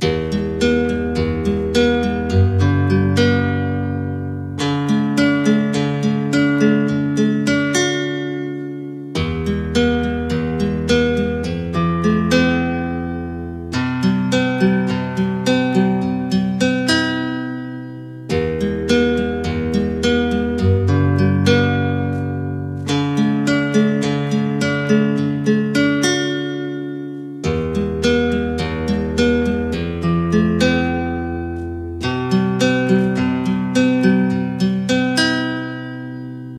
guitar loop 570B 105bpm
loop, guitar, 105bpm